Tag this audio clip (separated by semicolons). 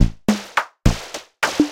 140-bpm; electro; drumloop; loop